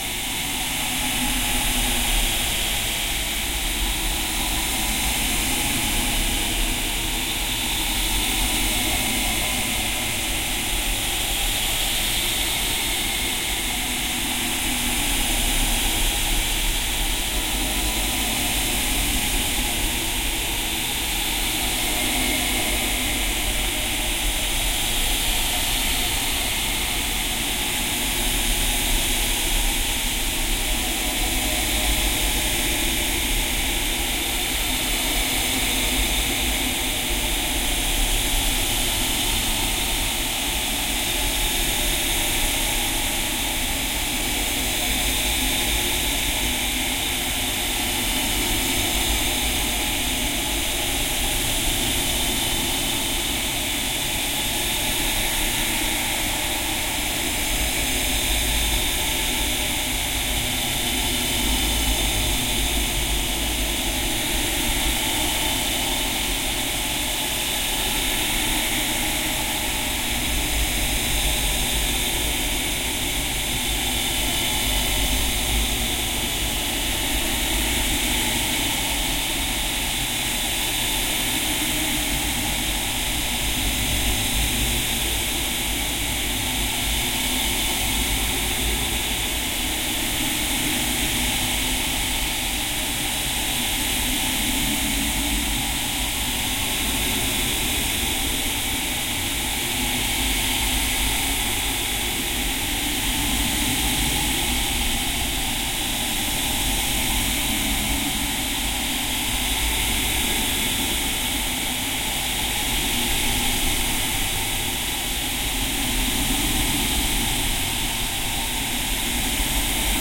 Heavily processed noise
Mucking about throwing a lot of filters and effects onto white noise.